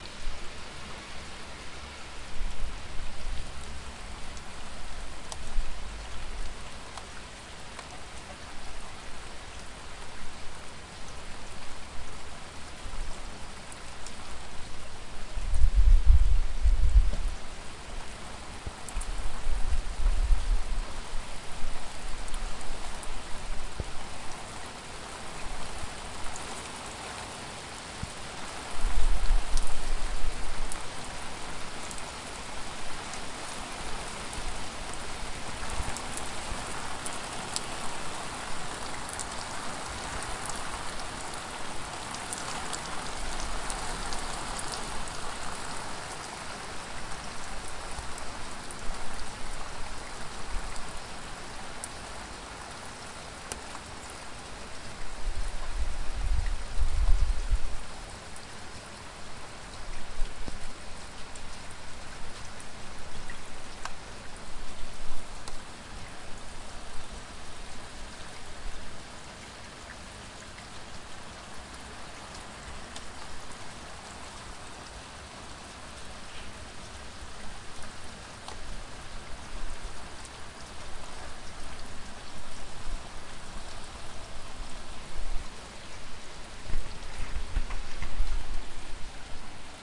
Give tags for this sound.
wet
nature
rain
weather
water

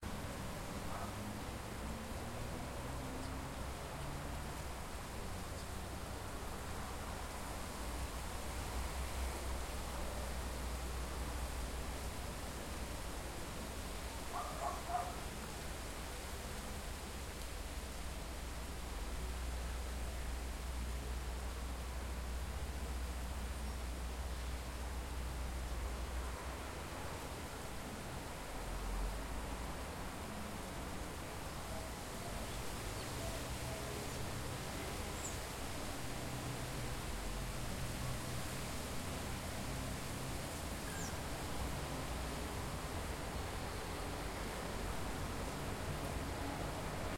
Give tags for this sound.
city
urban
wind
tree
field-recording
cityscape
trees

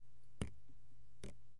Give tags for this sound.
drip
dripping
drop
drops
paper
water

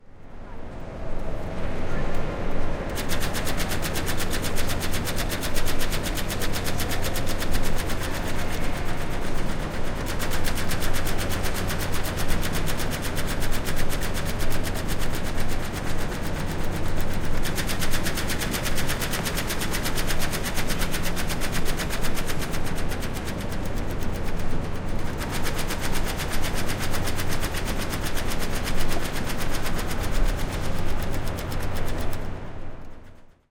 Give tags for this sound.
airport
board
field-recording
flap
flapboard
mid-side
sign
solari
train-station
undecoded
unprocessed
waiting-room

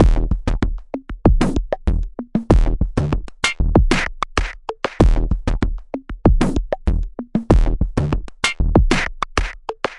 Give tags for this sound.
drum-loop
filtered
mgreel
morphagene

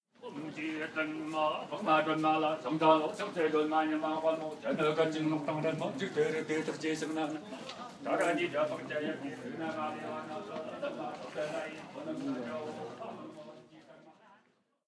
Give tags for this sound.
voice
Amb
Buddha
deep
male
Tibetan
man

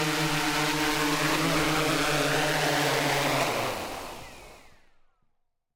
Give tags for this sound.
Bee
Drone
propellers

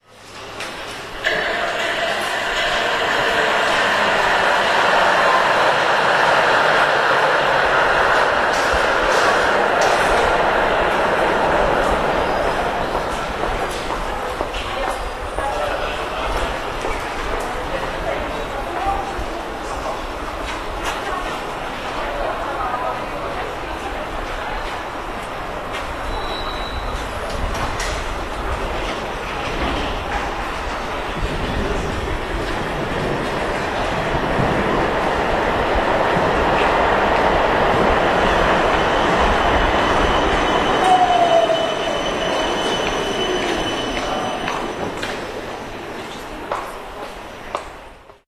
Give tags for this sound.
field-recording
noise
people
poland
steps
tram-station
tramway

